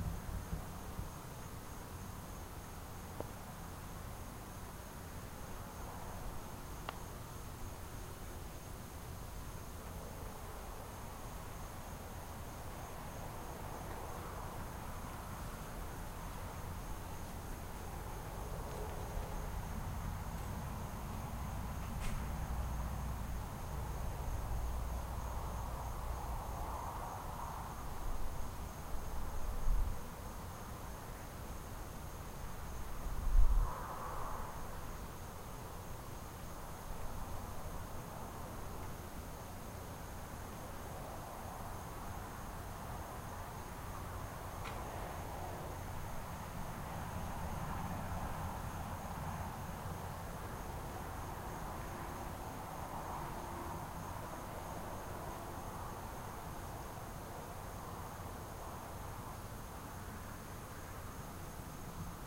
Night ambiance recorded with laptop and USB microphone. I set the microphone on top of a PVC tube sticking out of the ground on an empty lot in a mobile home park in Vero Beach to record the critters and passing traffic.
atmosphere, field-recording, night, animals, ambience